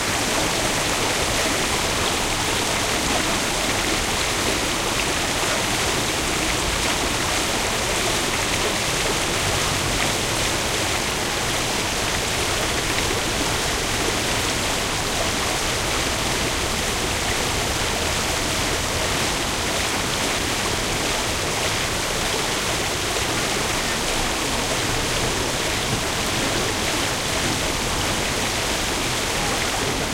the noise from the large fountain at Plaza de las Tendillas, in Cordoba (S Spain), as recorded with PCM M10 recorder internal mics